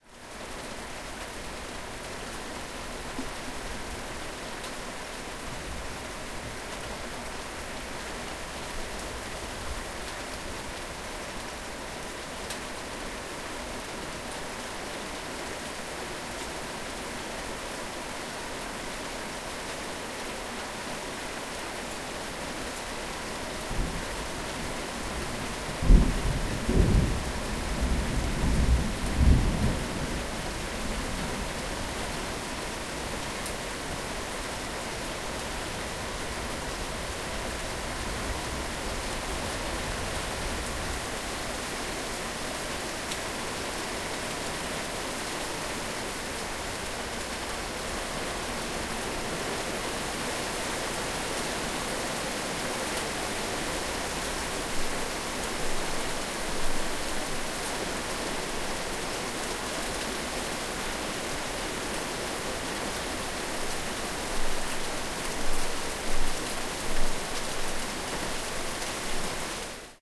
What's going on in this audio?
Rain and thunder in the countryside
Recorded from a window of a farmhouse. You hear the heavy rain coming down in the garden, water dripping from the roof and thunder.
Recorded in Gasel, Switzerland.
ambiance, weather, thunder, raindrop, ambient, storm, fieldrecording, lightning, thunderstorm, raining, recording, rain, dripping, atmosphere, thunder-storm, ambience, field-recording, soundscape, field, drops, nature, raindrops